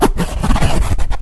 scribbling on a piece of cardboard with a pencil